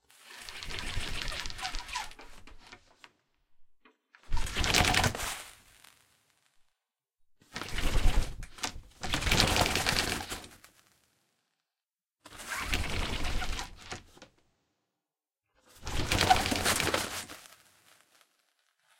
Rolling up a very old rolling curtain. Honestly, at some parts it sounds like a bird taking off.